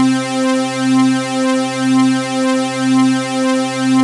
I think this was also made by combining synth samples.